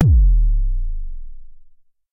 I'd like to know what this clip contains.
MBASE Kick 03
i recorded this with my edirol FA101.
not normalized
not compressed
just natural jomox sounds.
enjoy !
bd, kick, analog, bassdrum